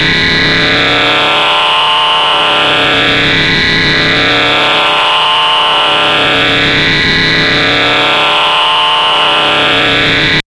quantum radio snap092
Experimental QM synthesis resulting sound.
experimental, noise